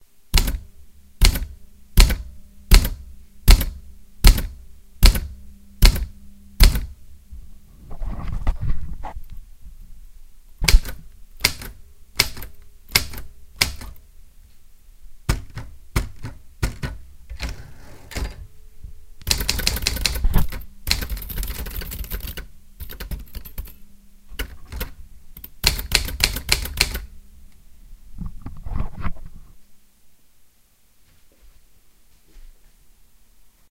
Raw sound of some strikes on old typewriter
Thank you for your downloads <3
You can buy me coffee here <3
synthesizer, singlehit, writer, synthesized, singlebeat, thud, short, techno, bell, sample, typewriter, thuds, trance, strikes, hit, strike, loud, raw, old, house, sound-museum, single, type, typing, Audio, oneshot, office